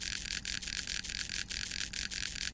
The sound of a fishing rod reeling in.
Created by recording and editing the sounds of some wind-up toys.
fish, fishing, fishing-rod, reel, reeling, rod